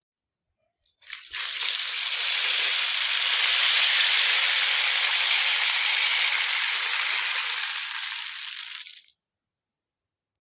Rolling pebbles enhanced 5
These sounds are produced by the instrument called rainstick. It has little pebbles inside that produce some interesting slide noises when held upside down or inclined.
I hope they can help you in one of your projects.
device, format, handheld, Indoor-recording, instrument, LG, rainstick, recording, smartphone